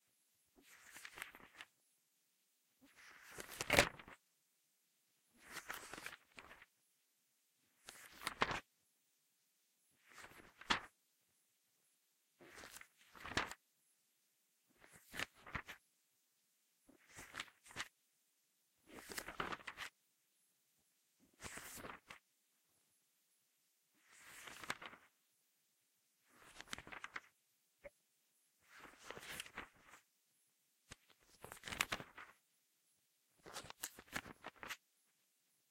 Turning book pages
Turning pages of a large bible concordance.
concordance, leaf, turn